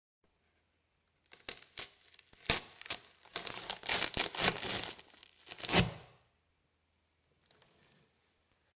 Cellotape Peeling Perc 01
Sound of peeling tape off roll
Cellotape, sticky, tape, adhesive